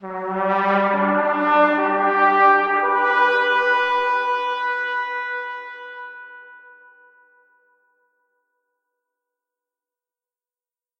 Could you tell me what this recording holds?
Trumpet Musical Orgasm
Musical representation of a climax. Thanks to Tony Boldt for playing the trumpet.
brass, climax, musical, orgasm, pleasure, reverb, trumpet